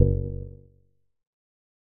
Percussive Hit 02 05
This sound is part of a series and was originally a recorded finger snap.
Recording gear:
sonar, spring, bounce, button, percussive, resonant, filtered, drum, percussive-hit, percussion, error